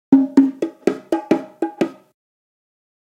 JV bongo loops for ya 1!
Recorded with various dynamic mic (mostly 421 and sm58 with no head basket)
tribal, Unorthodox, congatronics, bongo, loops, samples